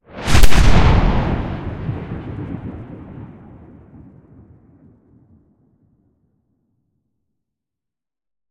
Slow motion gun shot, but without loosing the attack of the sound.
firing, gun, gun-shot, gunshot, pistol, rifle, shooting, shot, slo-mo, slow, slow-mo, slowmo, slow-motion, slowmotion, weapon